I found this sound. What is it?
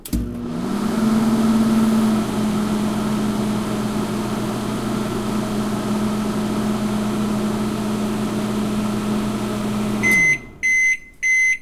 Microwave work and beep
kitchen, working, beep